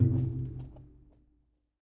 A hit on a metal container